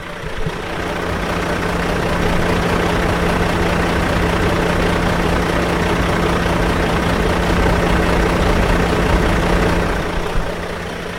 With only my USB mic and laptop, I bravely approached this massive internal combustion beast while it unloaded it's freight onto an eager loading dock at the grocery store seemingly unimpressed by the smaller truck keeping it's distance. It was an 18 wheeler Mac truck pulled in at abouta 45 degree angle to the dock.